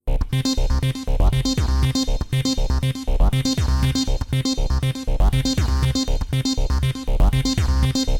Made on a Waldorf Q rack
120bpm, beep, loop, synthesizer, waldorf